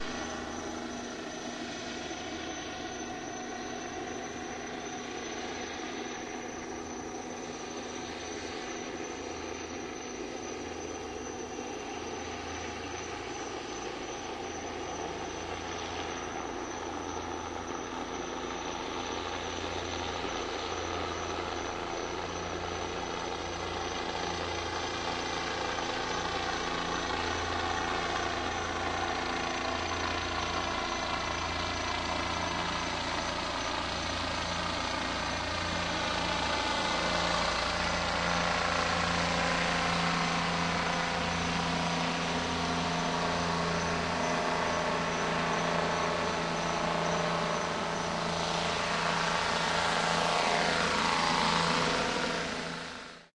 police chopper cricket
Police helicopter and a dozen cop cars, including a K-9 unit searching the hood, recorded with DS-40 and edited in Wavosaur. A fugitive insect chirps away in defiance of Johnny Law.